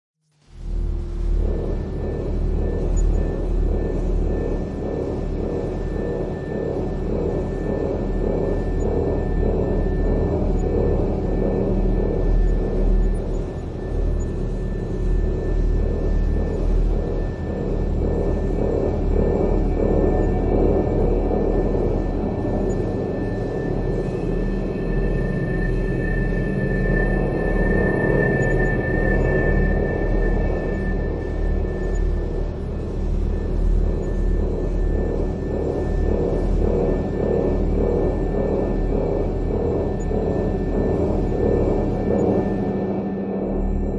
Atmo Dark 2
Dark Atmo - Factory room
Ambience, Ambient, Atmo, Atmosphere, Creepy, Dark, Eerie, Environment, Fantasy, Film, Horror, Movie, Sci-Fi, Sound-Design, Spooky, Strange, Thriller